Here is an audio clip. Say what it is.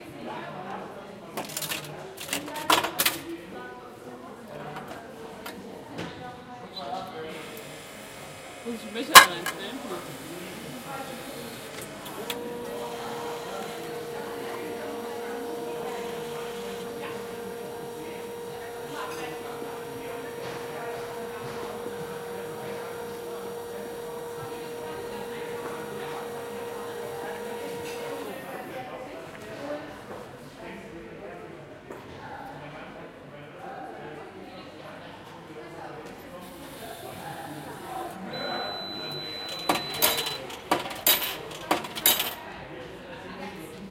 20141126 coffeedispenser H2nextXY
Sound Description: Münzeinwurf, Becher füllen
Recording Device: Zoom H2next with xy-capsule
Location: Universität zu Köln, Humanwissenschaftliche Fakultät, HF 216 (UG, Café Chaos)
Lat: 6.919167
Lon: 50.931111
Date Recorded: 2014-11-26
Recorded by: Saskia Kempf and edited by: Tim Meyer
Field-Recording, kitchen